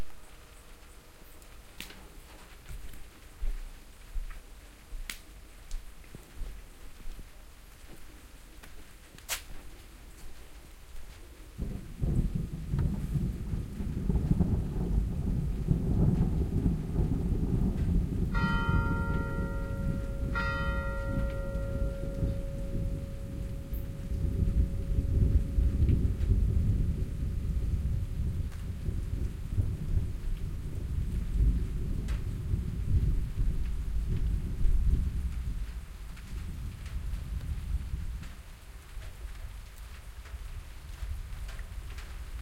tonerre-eglise
thunderstorm at night and a church bell in the back ground